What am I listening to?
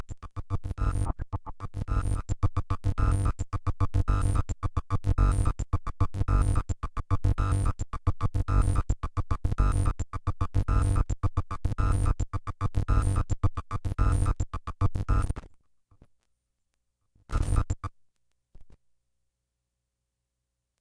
glitchy monotribe loop
digital, electronic, glitch, monotribe, noise, harsh, synth-percussion, lo-fi